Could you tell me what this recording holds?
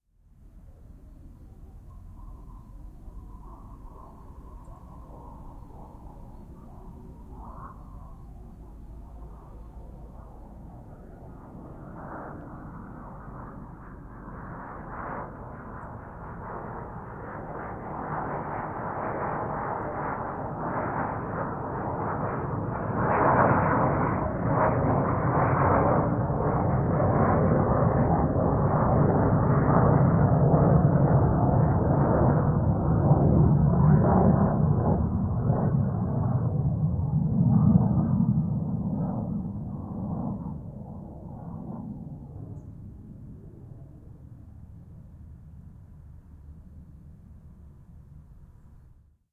Tornado Left To Right
A stereo field-recording of an RAF Tornado flying from left to right at a considerable distance from the mics. Rode NT-4 > FEL battery pre-amp > Zoom H2 line in.
aircraft, raf, tornado, royal-air-force, jet, xy, stereo, field-recording, fighter